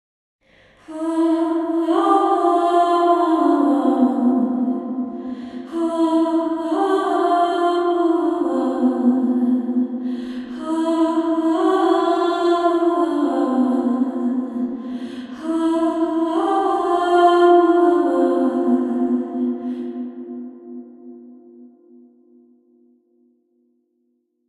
echoey wordless female vocal
Me singing ahhh. Lots of verb.
Recorded using Ardour with the UA4FX interface and the the t.bone sct 2000 mic.
You are welcome to use them in any project (music, video, art, etc.). If you would like me to hear it as well, send me a link in a PM.
More vocal clips from this song coming soon.